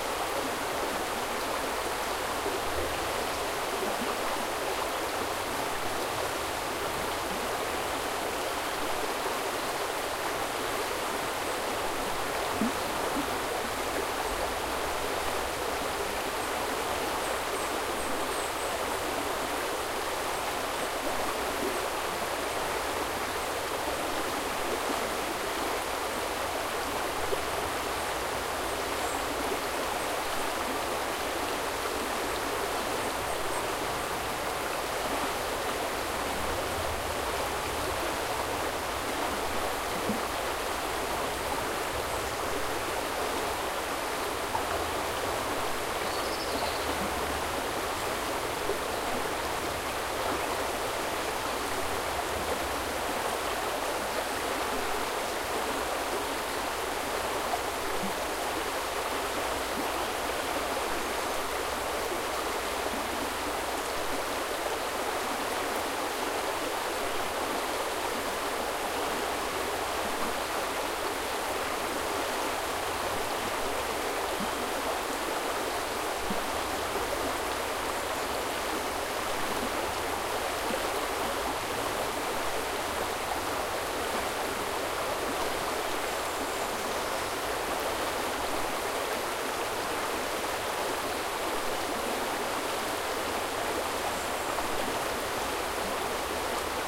Field recording of the Ourthe (Belgian Ardennes) near Hamoir, clear summer day.